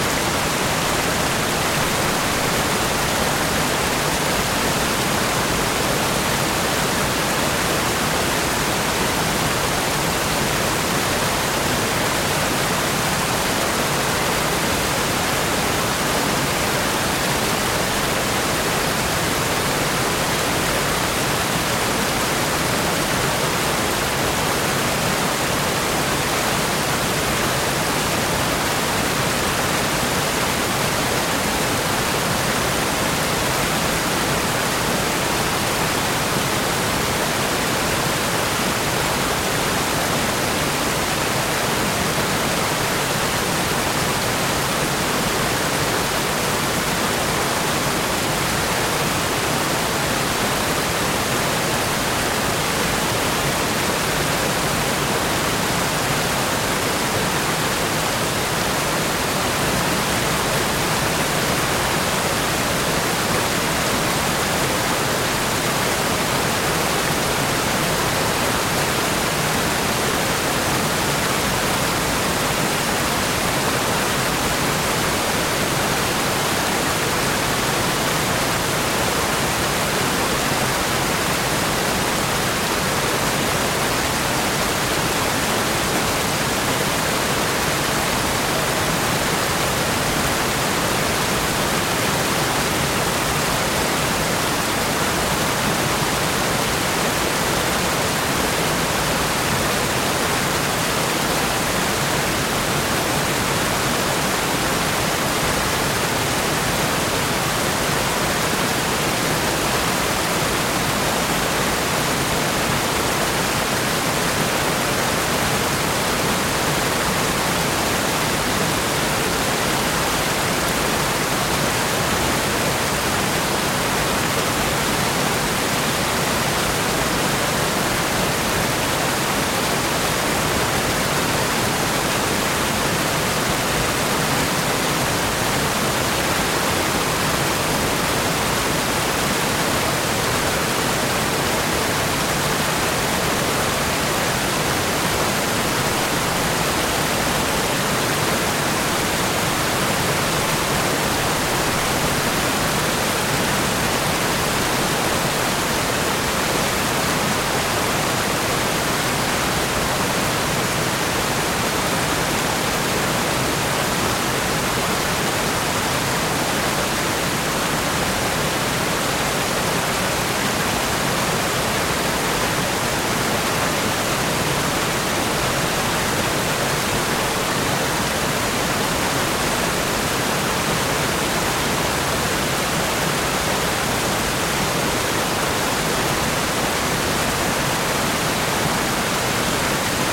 Stream Rapids 1
creek, current, lake, marsh, outdoor, rapids, river, stream, streaming, water